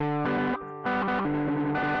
Randomly played, spliced and quantized guitar track.
overdrive, guitar, buzz, 120bpm, gtr, distortion, loop